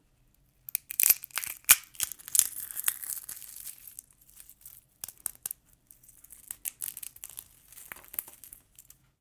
oeuf.ecrase 02
biologic, eggs, organic, crackle, crack